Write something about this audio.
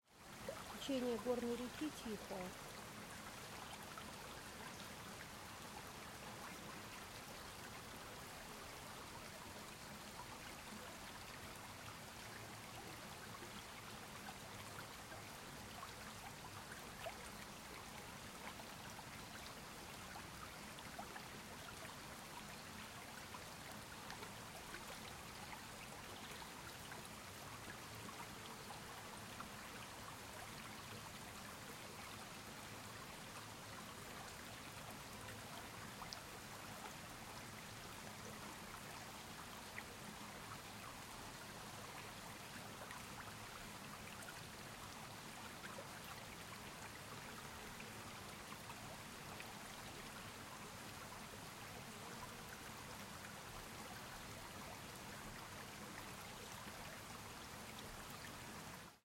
Mountain River 6(quiet)
various spots on small river